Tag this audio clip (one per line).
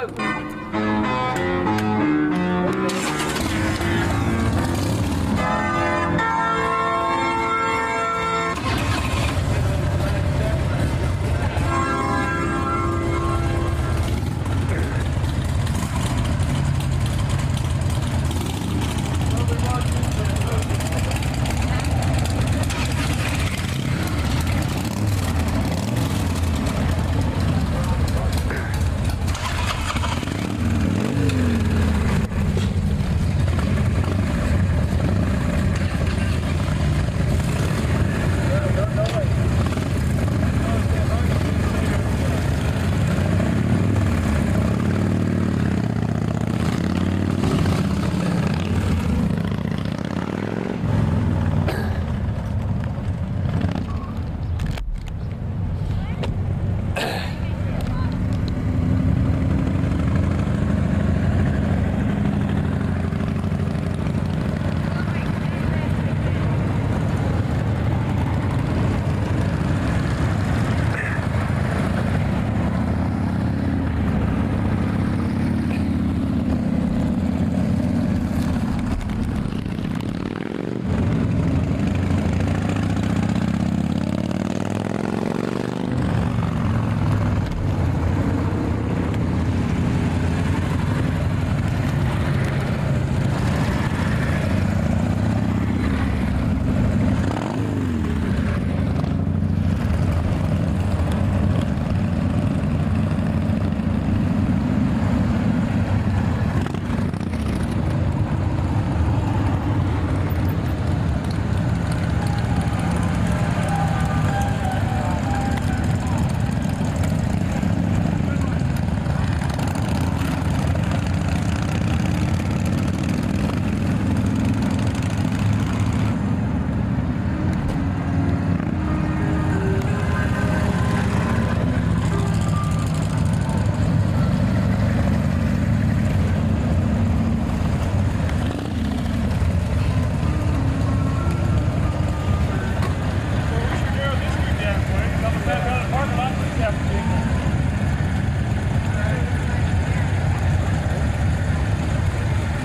bike
chopper
engine
field-recording
motor
motorbike
traffic